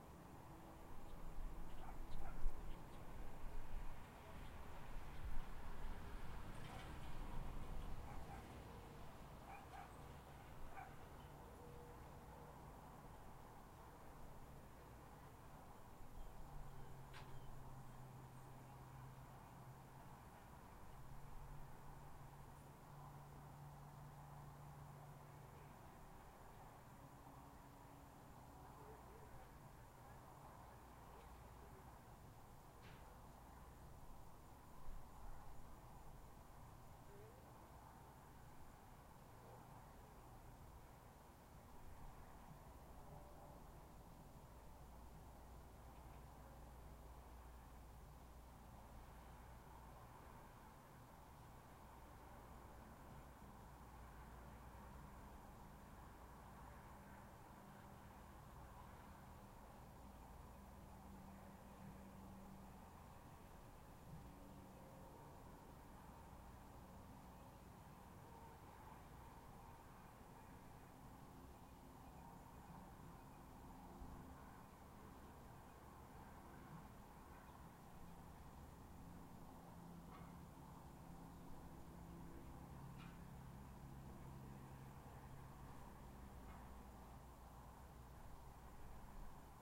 Out on the patio recording with a laptop and USB microphone. I placed the microphone up on top of the terrace this time to get sound from outside the privacy fence.